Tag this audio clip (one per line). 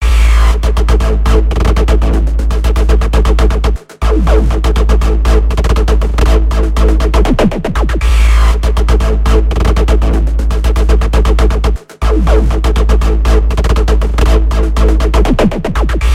bass
Dub
dubstep
edm
effect
free-bass
LFO
low
sub
wobble
Wobbles